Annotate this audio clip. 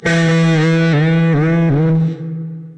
12th fret notes from each string with tremolo through zoom processor direct to record producer.
guitar, tremolo, whammy